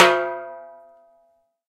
Timbales High Rimshot (Macho)
One shot sample of my LP Matador Timbales.
Rimshot on the high drum (macho)